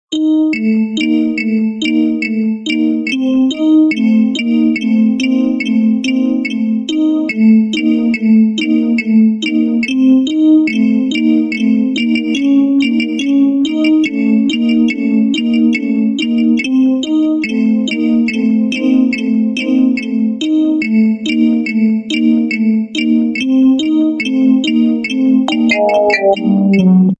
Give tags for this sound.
out-their
weird

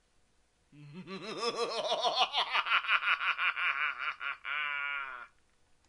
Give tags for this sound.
cackle
evil
laugh
male
single
solo

